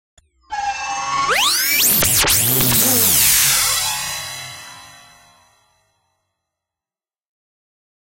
Robot abstraction
abstract, alien, droid, robotic